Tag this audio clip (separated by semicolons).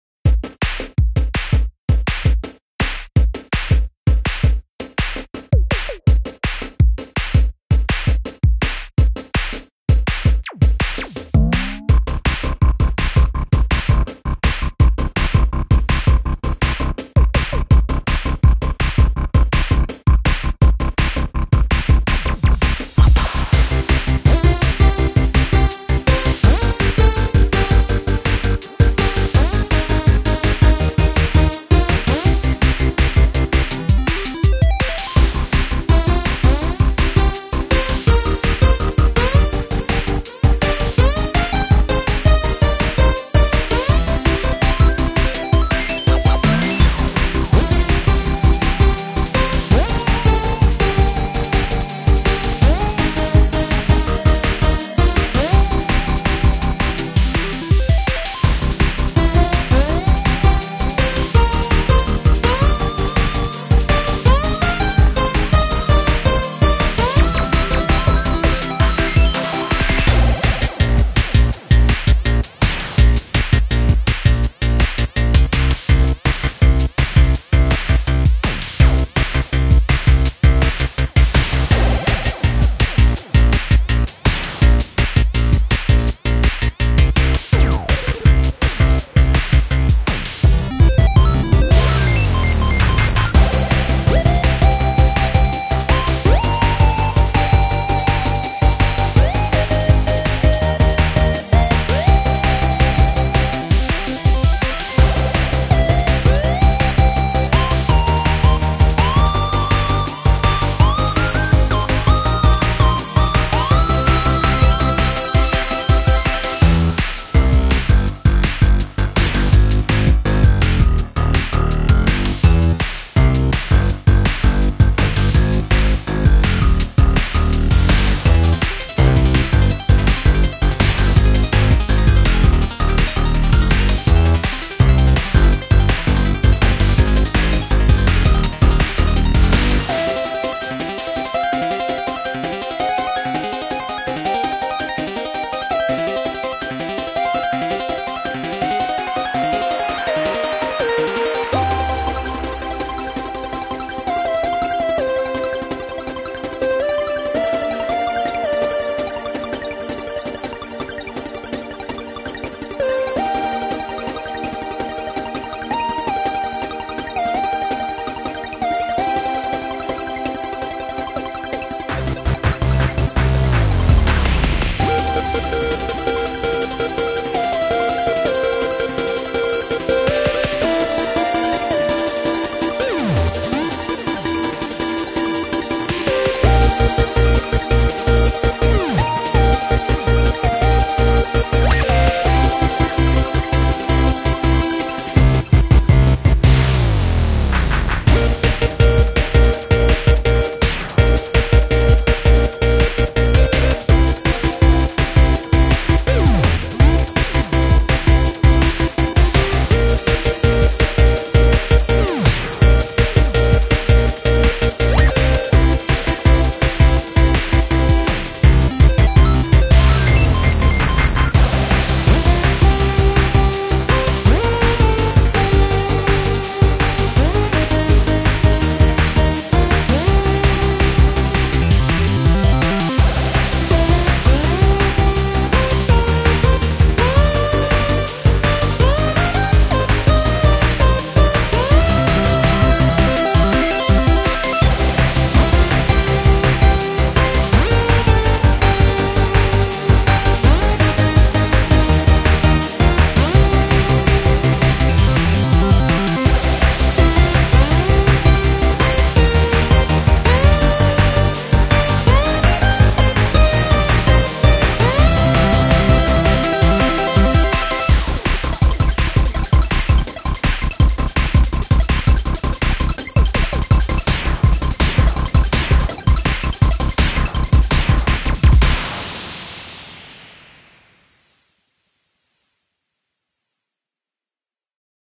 snakegame; music; game; computer